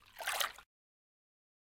Wading Through Water
This sound is of a hand moving through water creating a wading effect.
Flowing, Wading, Water